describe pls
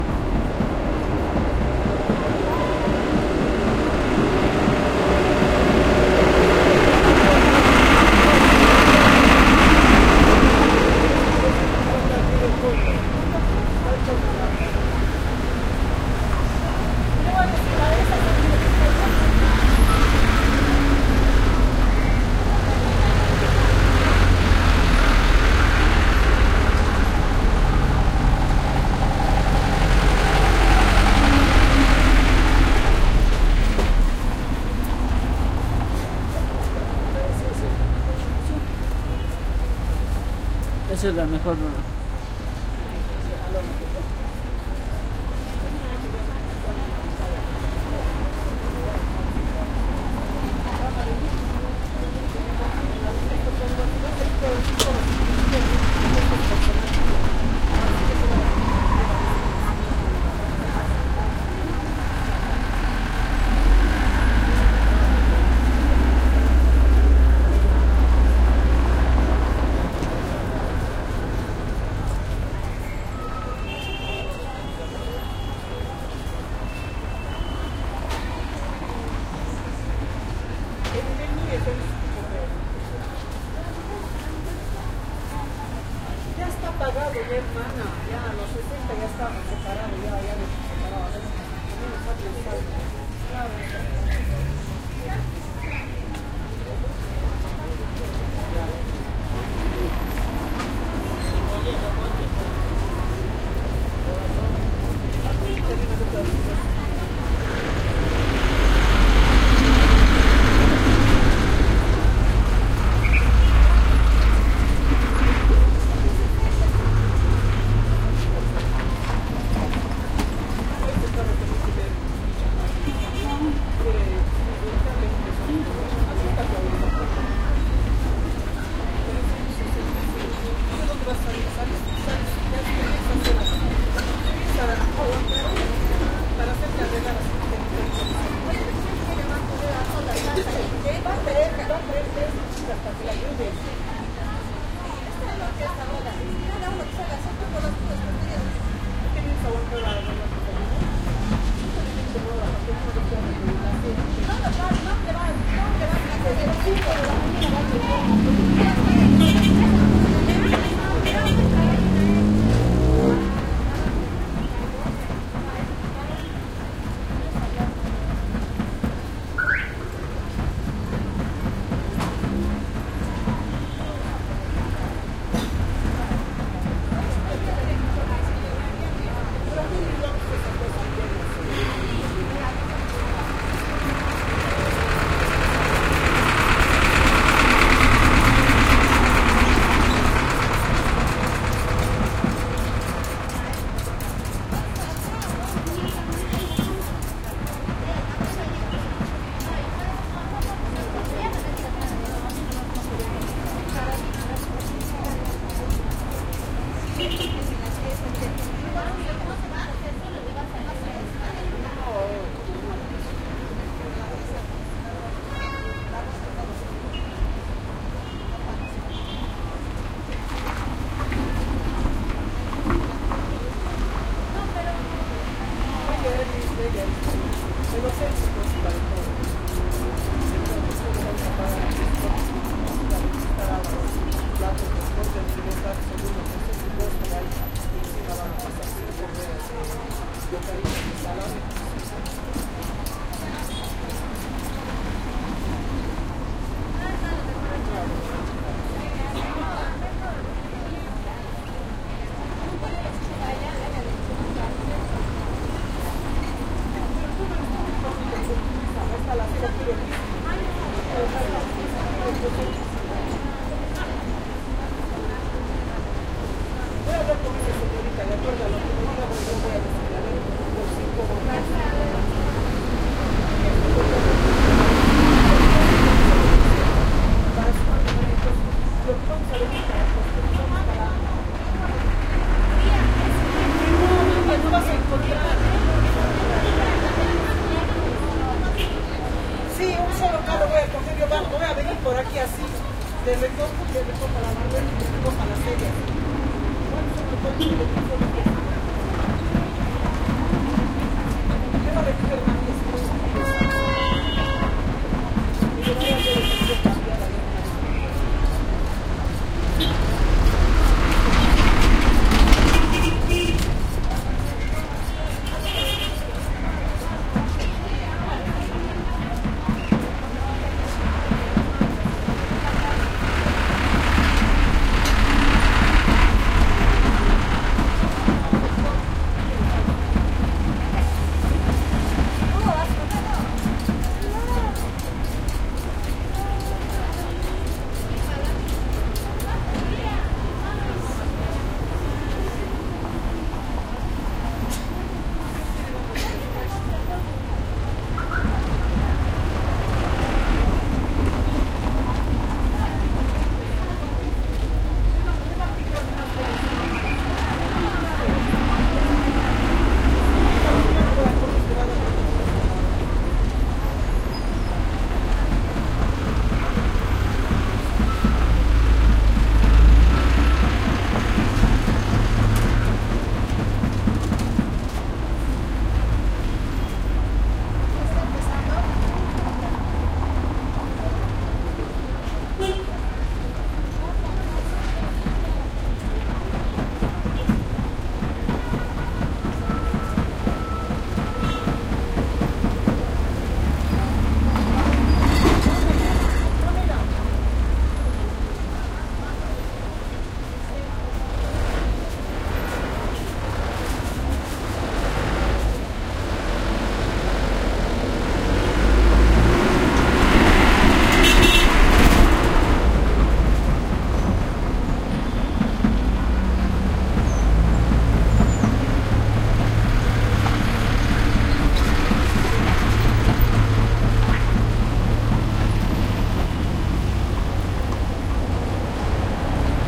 street busy near market people activity knife sharpening and traffic esp throaty busses pass slow close and nearby over cobblestones Cusco, Peru, South America